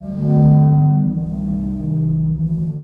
Giant breathing 24
One in the series of short clips for Sonokids omni pad project. It is a recording of Sea organ in Zadar, spliced into 27 short sounds. A real giant (the Adriatic sea) breathing and singing.